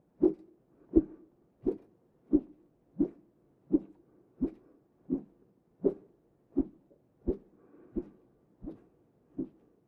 Stereo. Meant to sound like the tail of a large beast going back and forth